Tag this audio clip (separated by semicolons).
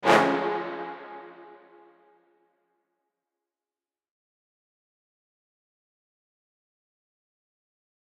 dramatic-stings; dramatic-moment; cinematic-drama; dramatic-sting; big-orchestral-hits; shock; orchestral-hits; dramatic-orchestral-hit; dramatic-orchestral-hits; dramatic-hit; strings; epic-moment; shocking-moment; shocking-moments; big-hits; big-hit; orchestral-hit; orchestral; drama; string-hits; string; cinematic-hits; cinematic-hit; epic-hit; dramatic-hits; string-hit; cinematic-stings; dramatic-moments; cinematic-sting; good-god-holmes